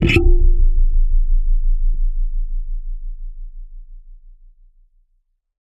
tweezers scrape 3
Tweezers recorded with a contact microphone.
fx, contact, sfx, sound, effect, tweezers, metal, close, microphone, soundeffect